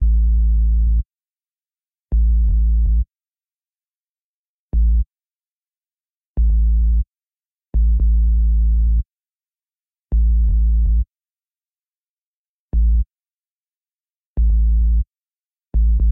PHAT SUB BASS
Wobbles, LFO, bass